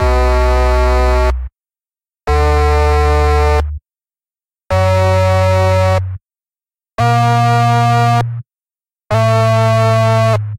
Sub-osc-009
Still using the VST Tracker by MDA of Smartelectronix to provide a sub-oscillator to add to the monotron sound.
Another set of sounds with the mda-tracker providing sub-oscillator for the sounds generated by the monotron.
mda-tracker, tweaking